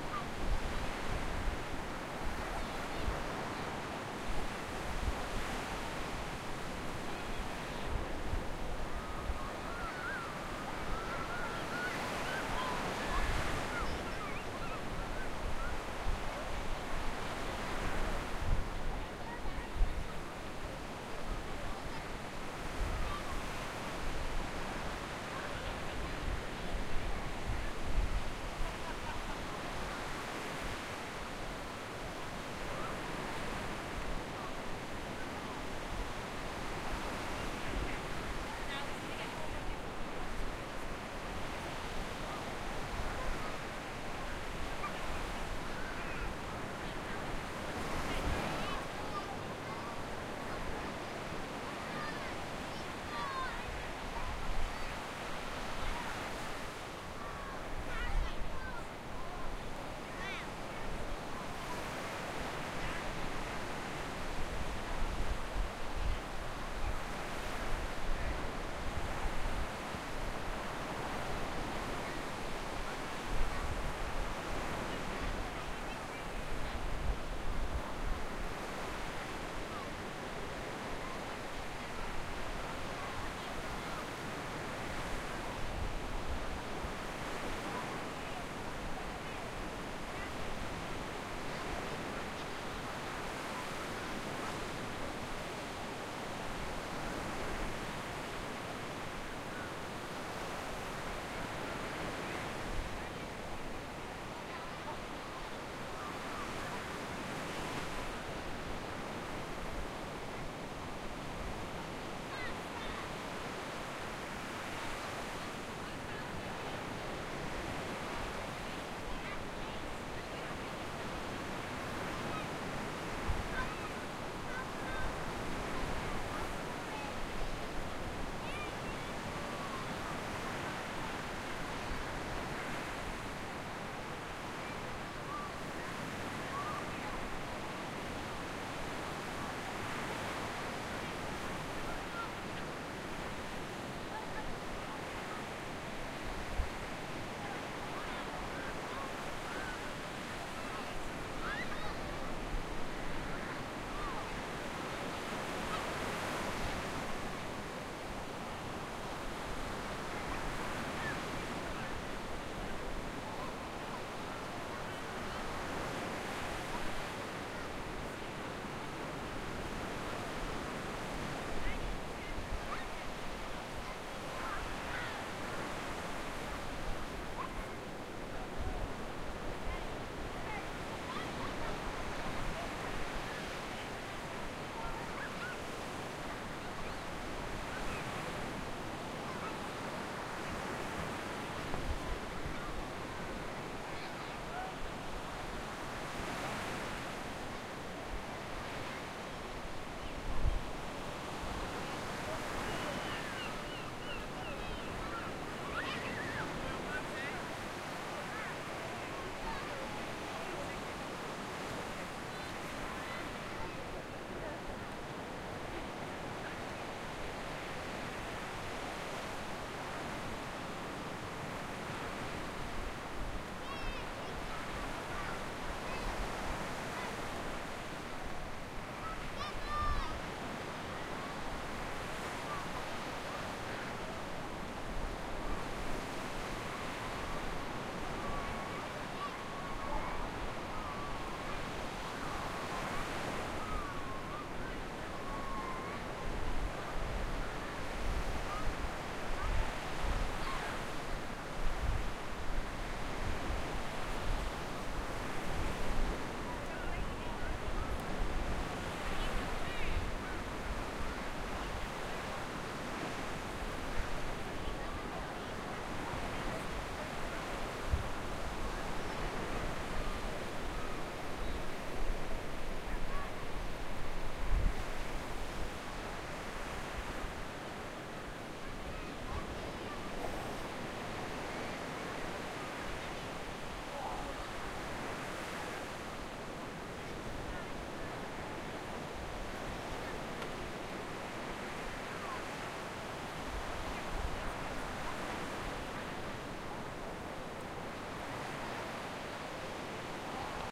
Recorded whilst sitting on Porthcurno Beach, Cornwall, England on a sunny but windy August afternoon. The tide was in and the waves were moderate, although they sound bigger on the recording. You can hear waves on the sand, rolling surf, kids playing and screaming and some seagulls. One of a series recorded at different positions on the beach, some very close to the water.
ambience, atmosphere, beach, children-playing, Cornwall, England, field-recording, nature, sea, soundscape, waves